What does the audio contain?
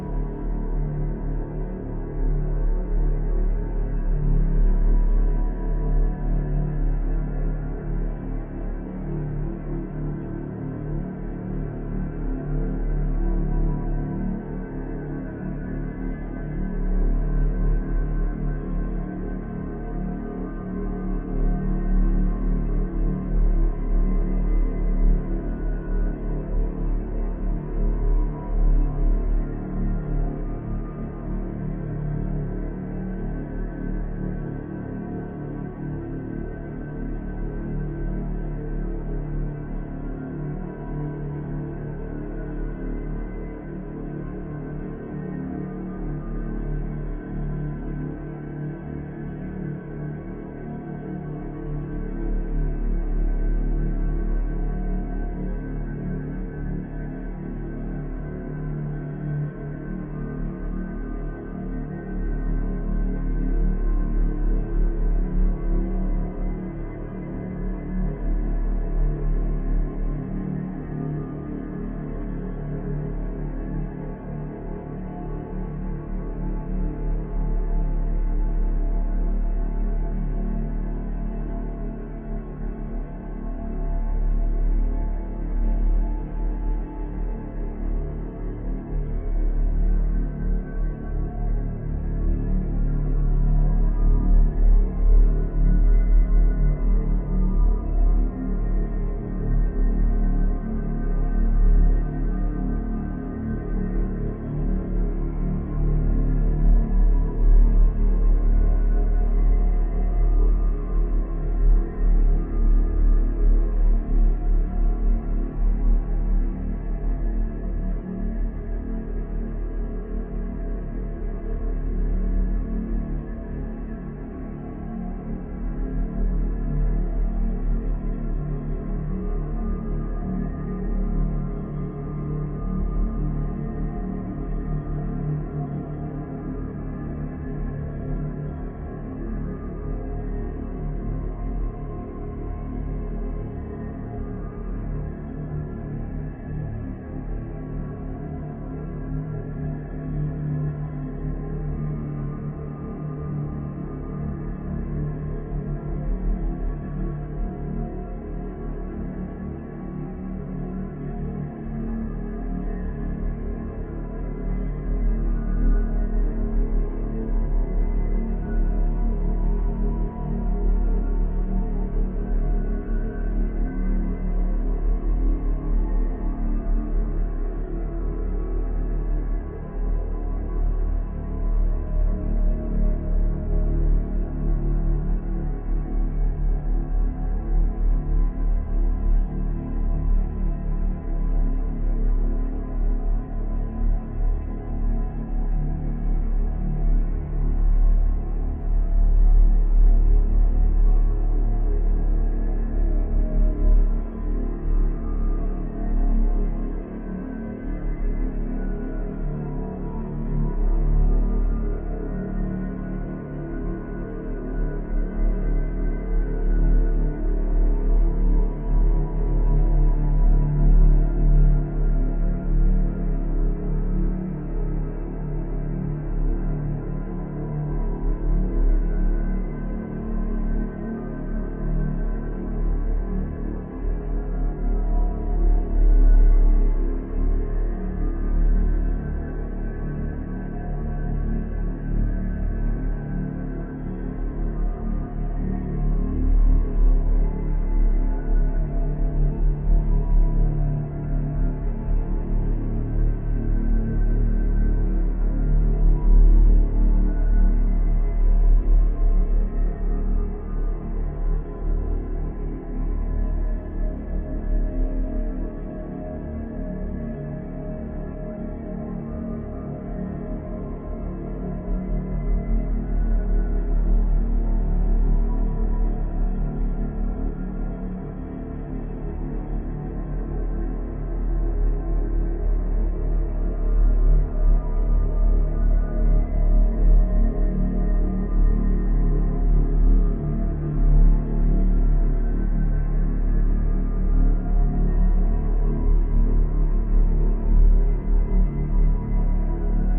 Five minutes of dark drone with binaural effects.
Created by processing in SirenAudio Generative pad generated by DSK Ethereal PadZ 2.
First few seconds long pad was generated in DSK Ethereal PadZ 2 synthesizer.
Then it was loaded into SirenAudio Generative granular processor and spliced into random segments. Generative played those segments randomly, reversing and overlapping them.
After all, some binaural autopanning effects were added.
atmosphere, binaural, dark, drone